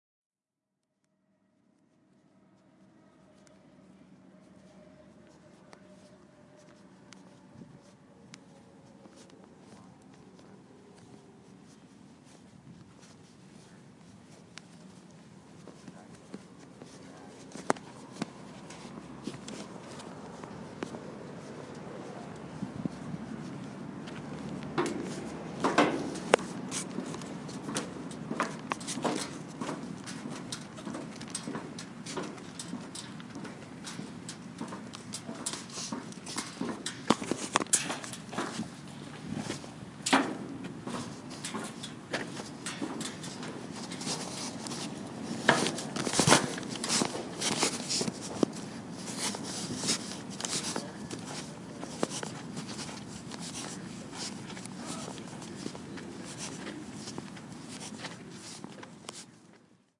Partner 2 walk 2
A one minute excerpt from a walk around a high school campus
High-school, field-recording, City, Traffic